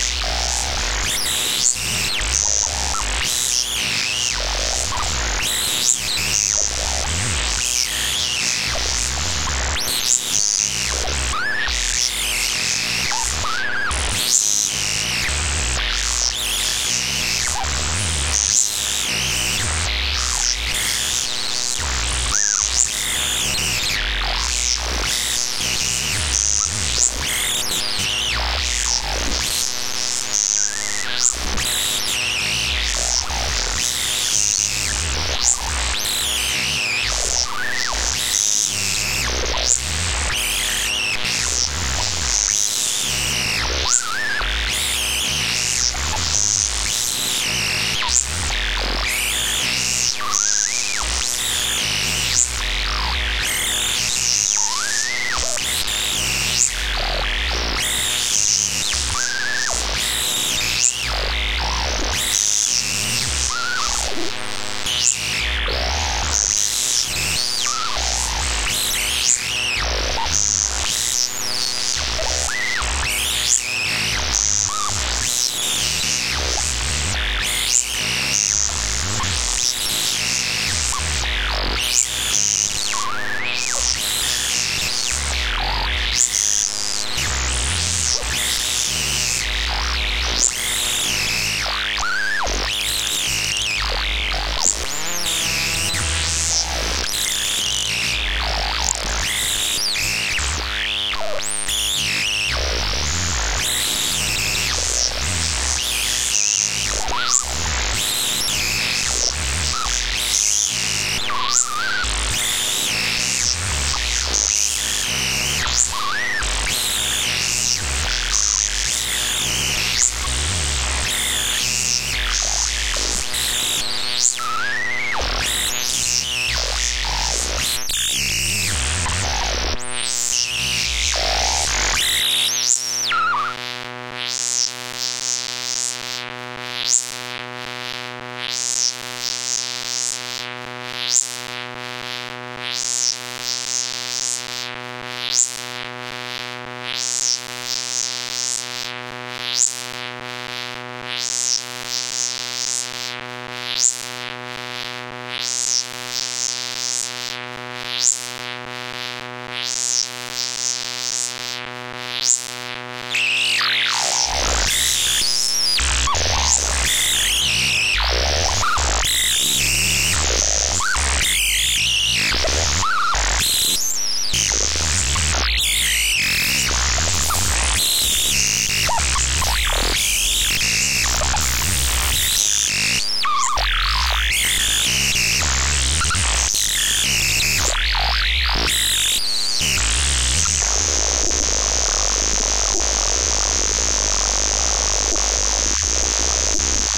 Glitched Oscillations 2. - electric circuit
electricity, oscillation, digital, feedback, glitch, modular, circuit, electronic